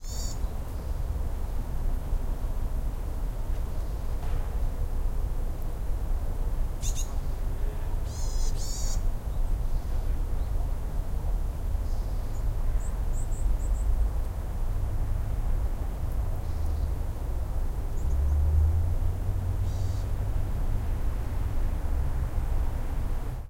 0052 Birds and people
Birds and some people traffic background
20120116